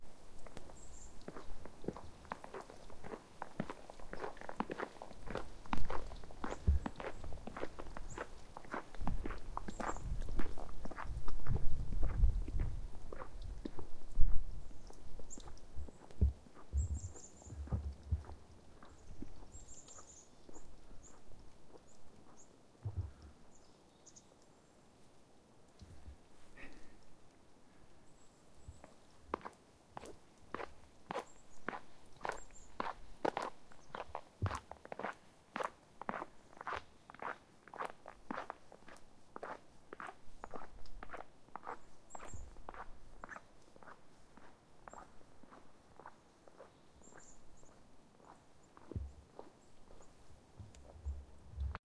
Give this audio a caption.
Steps Snow Bridge
Footsteps in the snow from left to right. Recorded on a small bridge over water in Quebec, Canada.
bridge, field-recording, footsteps, in-the-snow, snow, snowing, steps, walking, water